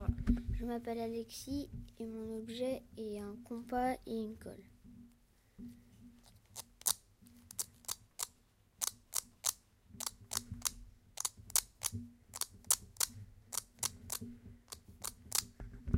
Alexis-compas et colle
compass and glue